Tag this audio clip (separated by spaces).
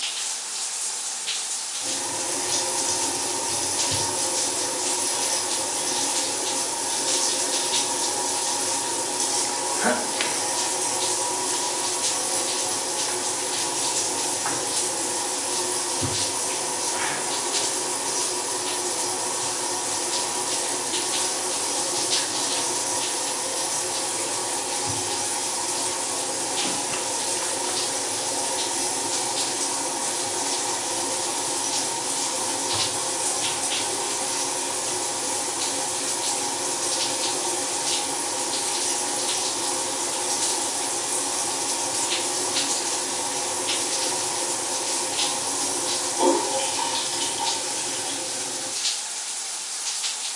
mix shower water